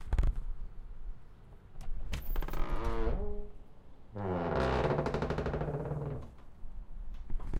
ns doorSlamOutside
A creaky front door - slammed closed - recorded from inside
outside, slam